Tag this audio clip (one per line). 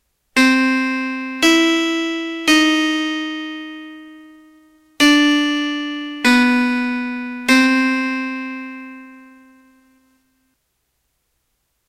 ending
movie
game
film
sad
animation
video
bad
blackout
video-game